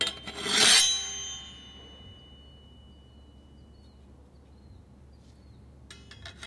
Sword Slice 22
Twenty-second recording of sword in large enclosed space slicing through body or against another metal weapon.
movie, sword, sword-slash, foley, slice, slash